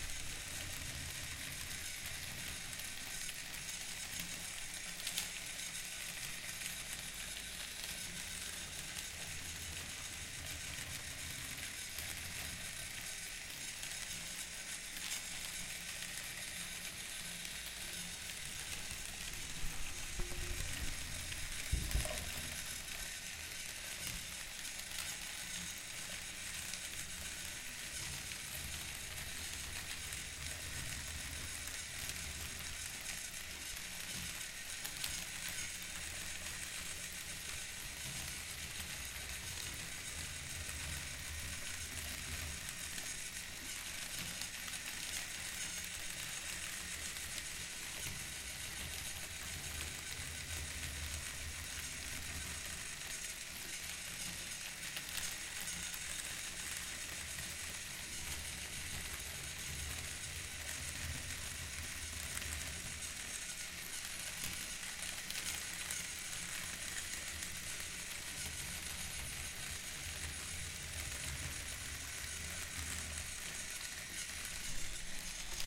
The Little Stereo Engine that Could
This was a battery-powered train car running in circles on its plastic track. Is the sound pleasant? No. Did I hold my Zoom H4n upside-down in the center of the circuit so the engine slowly pans from ear to ear? Absolutely.
pan, plastic